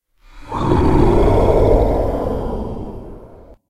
This monster sound is more of a roar or snarl. It's really just me going, "grrrrrrr" and "Rarrrr!" and all kinds of other annoying noises! Then I run it through Audacity and lower the pitch on each one three or four steps, slap on some gverb, and overlap them. The deeper sounds also get about a 50% bass boost. To give it a breathy sound, I also made some hissing-cat noises into the mic. (Ever think that we sound-editing buffs must look pretty ridiculous sitting at a computer making these noises?)
growl, horror, monster, roar, snarl